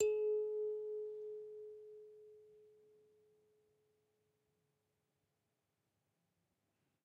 I sampled a Kalimba with two RHØDE NT5 into an EDIROL UA-25. Actually Stereo, because i couldn't decide wich Mic I should use...
short, kalimba, unprocessed, pitch, african, note, nature, sound, ab